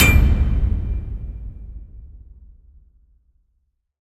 Metal thunder impact 2(5lrs,mltprcssg)
The sound of a cinematic fat hit with a metal top. The sound consists of a set of recordings of hits against a metal oil heater, two synthesized hits, and several metal hits that I got from recordings of the clicking of a folding sofa mechanism. All layers have been pitch-changed. In layers with a metal top, the acoustics of a large hangar are added by a convolution reverb. There is also a lot of EQ, compression, exciter, limiting. Enjoy it. If it does not bother you, share links to your work where this sound was used.
cinematic, film, swish-hit, drum, filmscore, swoosh, whoosh, boomer, trailer, metal, kick, boom, sound, low, motion, fx, riser, bass, hit, sfx, stinger, transition, movie, effect, score, impact